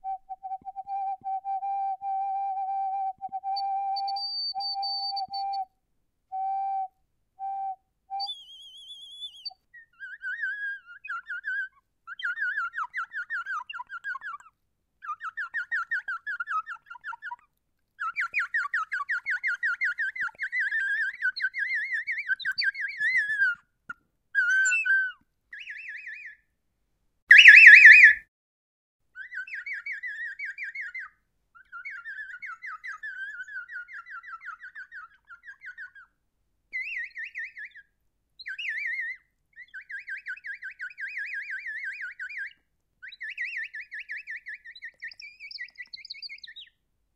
Bird Water Whistle
Making noises with a whistle filled with water. Recorded with a Zoom H2
flute, instrument, music, musical, warble, warbling, water, whistle